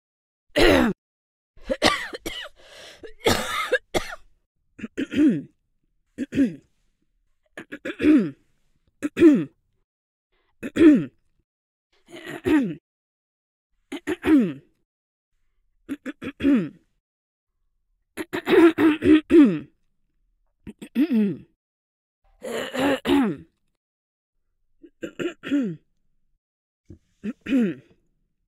Middle Age - Female - Clearing Throat
I drank a Coke before recording and the result was a lot of throat clearing during my narration. These are all real / genuine attempts at clearing my throat.
phlegm, clearing, throat, ahem, female, middle-age, smoker, mucous